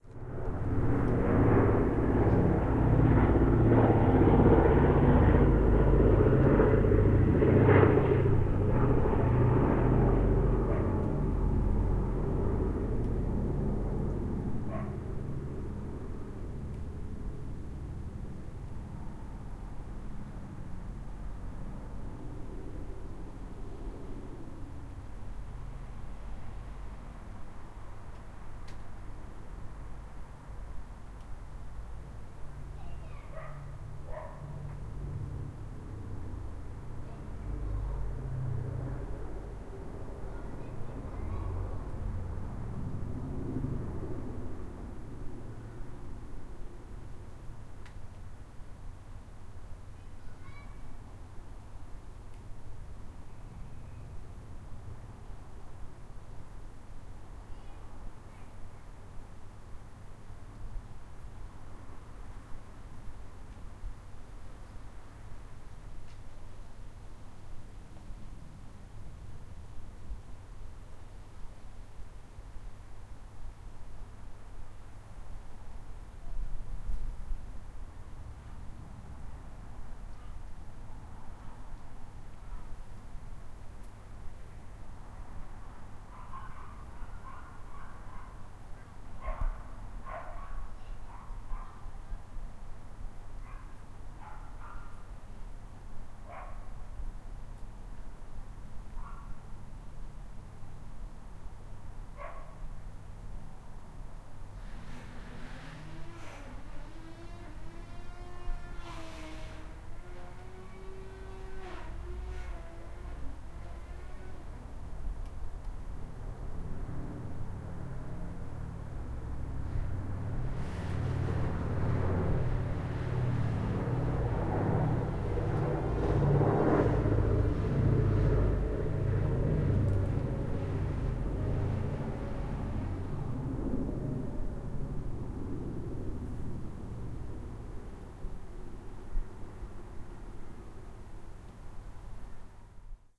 a brief encounter with a B17 Flying Fortress 'Sally B' as she flew over Belfast on the 14 Sept. 2013. General background noises include dogs barking, children playing in the distance and a garden strimmer being used nearby. Minor trim and fade at either end and normalized/exported with audacity. I have a couple more shorter clips that I will upload...